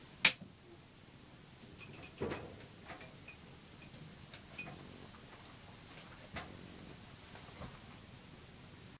Fluorescent lights flicker on in a workshop.